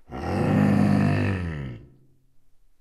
roar passive aggressive
Recording of a roar used in a computer game for a monster. This is the in between version of three alternating sounds. This one is more like "I know you are here and I'm ready to eat you, but if you leave now, it's ok too". Recorded with a Sony PCM M-10 for the Global Game Jam 2015.
computer-game, effect, game, monster, passive-aggressive, roar, sfx, video-game